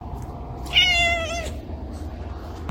Content warning
meow cat kitty purring animal feline meowing purr kitten